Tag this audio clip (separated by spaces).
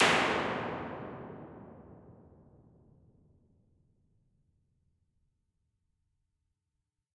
Impulse Plate IR Response Reverb